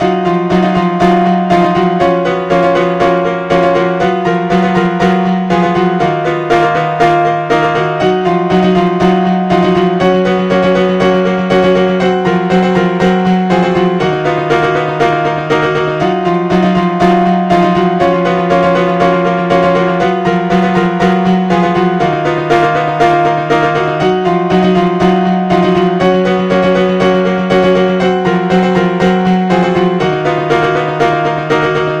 Ahh It's ghastly! It's hideous and mental and jarring. I feel like I've lost my mind . I want to bury my head under a pillow . Why would I make something so awful?
Just messing :)
From the pack Piano Loops, more coming soon
piano, demented, discord, awful, weird, wtf, bad, ghastly, madness, jarring